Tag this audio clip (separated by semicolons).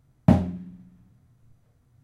drum; kit; tom